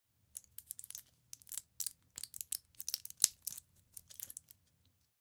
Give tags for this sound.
metal; sacar; Tomar

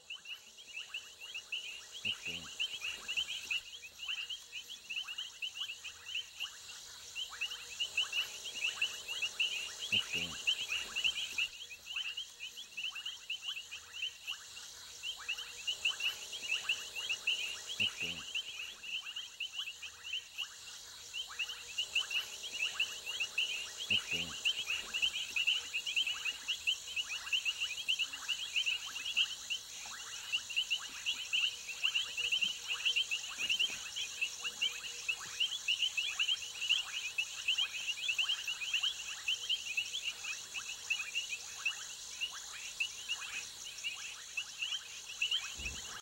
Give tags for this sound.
nature; croaking; frogs; field-recording; singing; insects; Africa; night; crickets; swamp; frog

